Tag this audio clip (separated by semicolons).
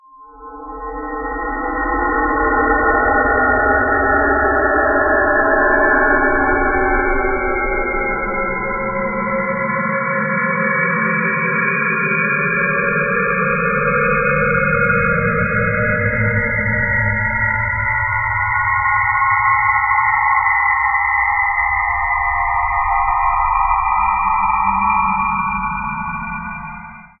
drone; whistle